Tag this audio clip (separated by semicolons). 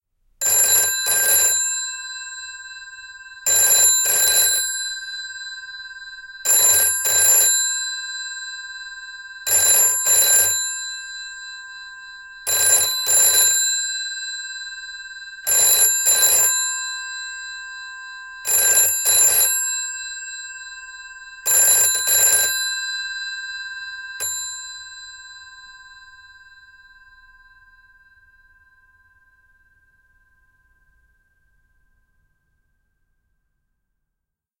GPO; 80s; 60s; analogue; office; post; phone; Landline; retro; 70s; 746; telephone